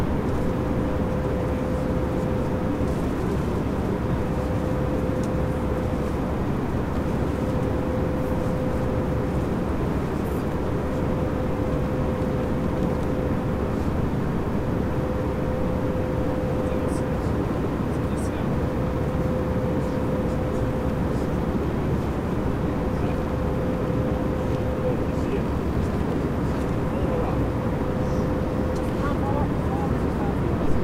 Ambient sound inside of an Airbus A320 in flight.